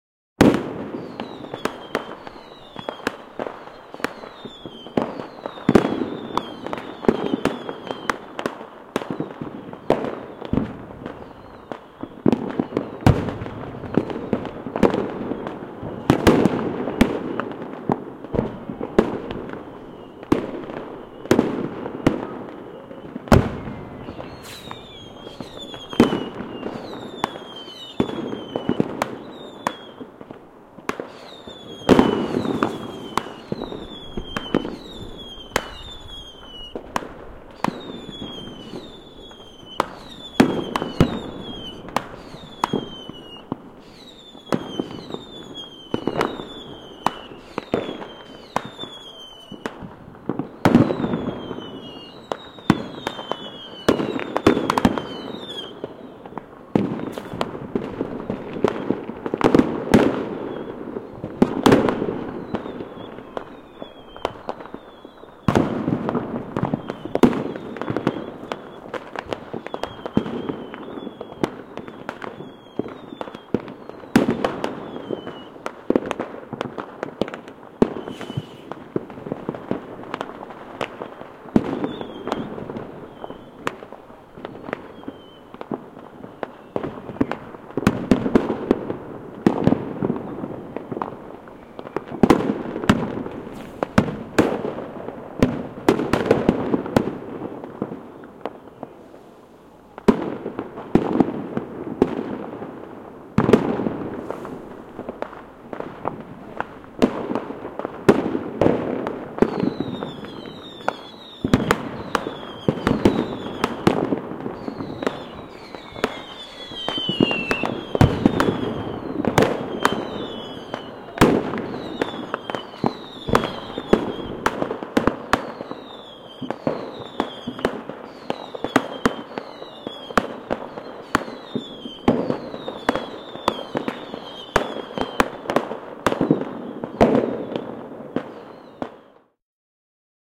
Ilotulitus Uudenvuoden aattona pienellä paikkakunnalla. Ilotulitusrakettien pauketta, välillä voimakkaita paukkuja. Joitain kaukaisia ihmisääniä.
Paikka/Place: Suomi / Finland / Vihti, Nummela
Aika/Date: 31.12.1992